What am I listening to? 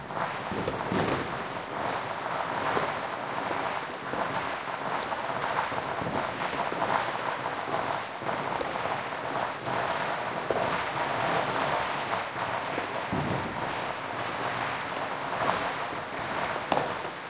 NYE Crackle
Crackling string of firecrackers
fireworks, fire-crackers, new-years-eve, Crackling